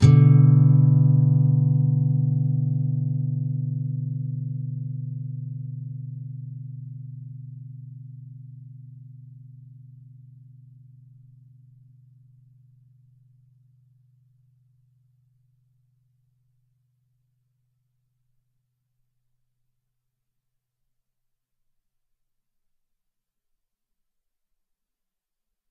Bminsus4 2strs
E (6th) string 7th fret, and A (5th) string 5th fret. If any of these samples have any errors or faults, please tell me.